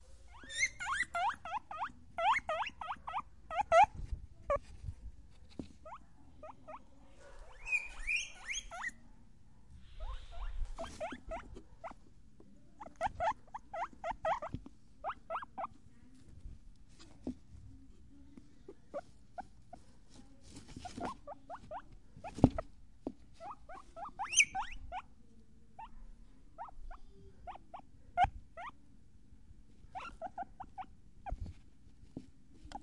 Guinea pig 01
Cuy
Cavia porcellus
animal, cuy, guinea-pig, pet